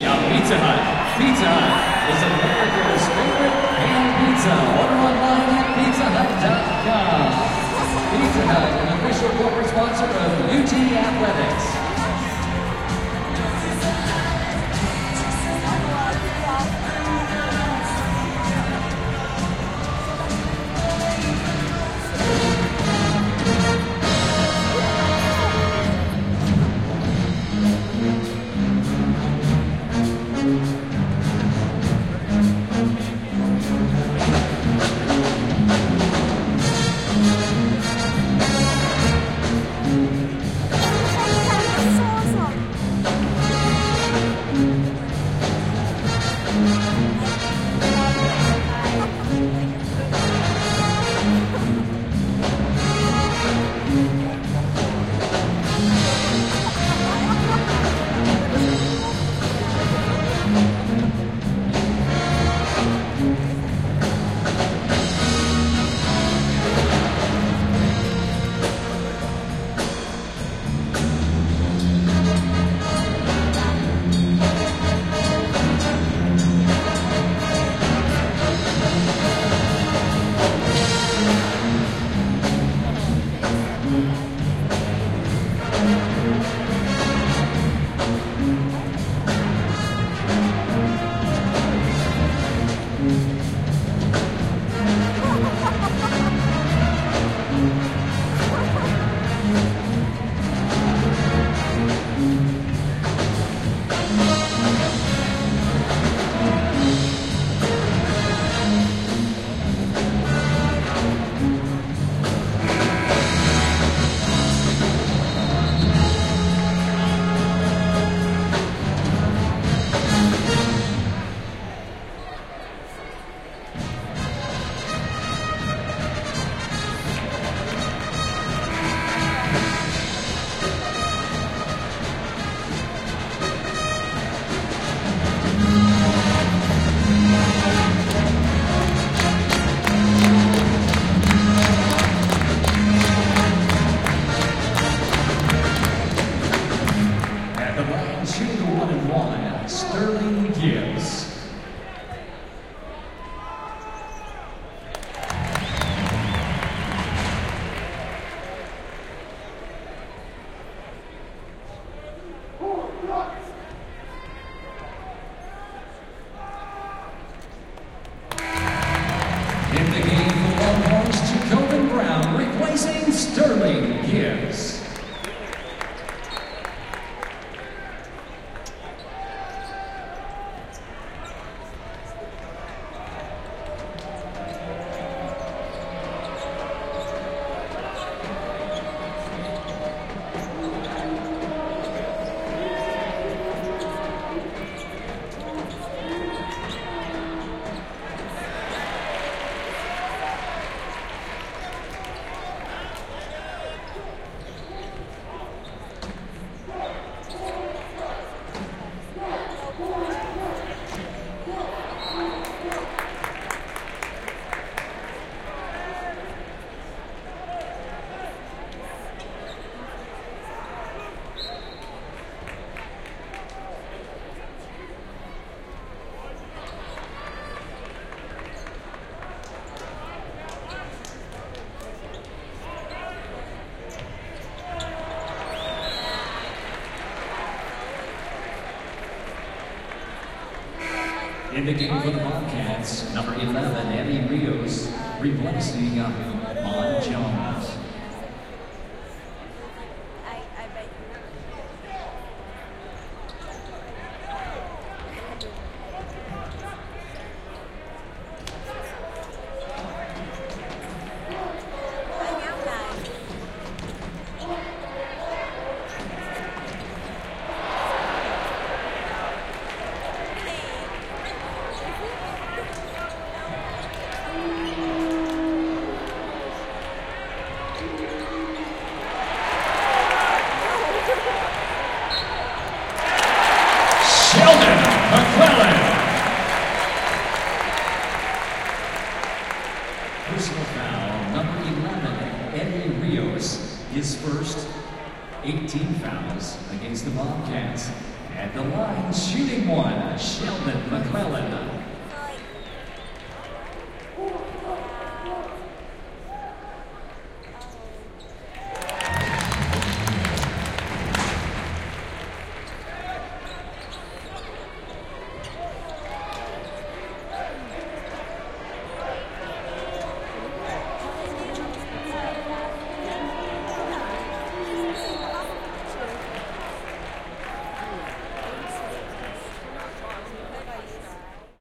Ambi - Student basketball games Texas-Texas State i stadium Austi - Commercial, bad plays Herbie Hancock, game - binaural stereo recording DPA4060 NAGRA SD - 2011 12 10
DPA, 4060, stadium, Frank, Ambi, basketball, ambiance, NAGRA-SD, binaural, public, Austin, Erwin, Center